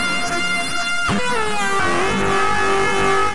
Electric Guitar 4
Emulations of electric guitar synthesized in u-he's modular synthesizer Zebra, recorded live to disk and edited in BIAS Peak.
blues, electric, electronic, guitar, metal, psychedelic, rock, synthesizer, Zebra